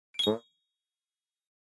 A cartoony blink sound effect made in Logic Pro X.
I'd love to see it!
short, blink, reaction, fun, comic, cartoon, eye, cartoony, bell-tree, comical, funny, cute, musical, silly, honk